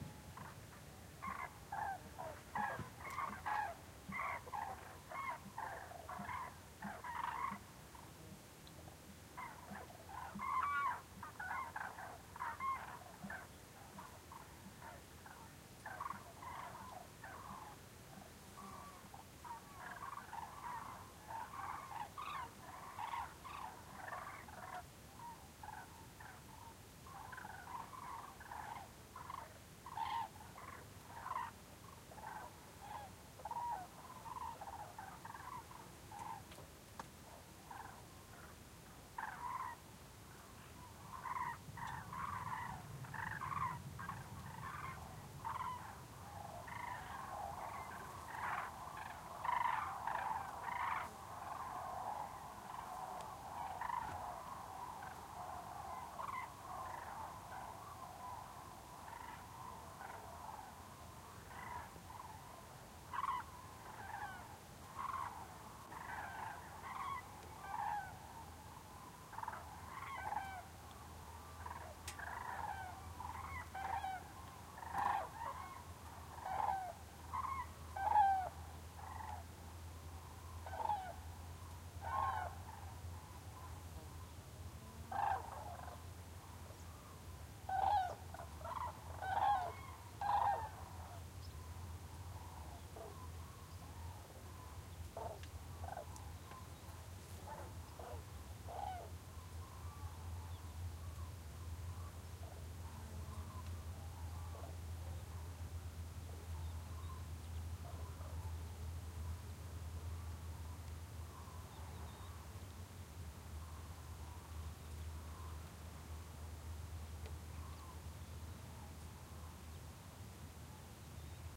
Cranes calling, distant. Recorded near Higuera de Llerena, Badajoz (S Spain) with Shure WL 183, Fel preamp, PCM M10 recorder.